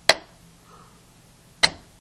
The sound of a typical
light switch being
flicked up and down, respectively.